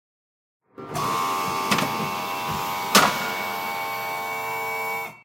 electric car BRAKE mechanism